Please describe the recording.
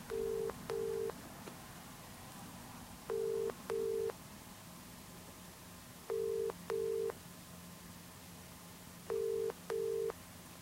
The ringback tone (the tone you hear once you've dialled a number) on a UK landline.
beep, british, phone, ring, ringback, ringing, telephone, tone, UK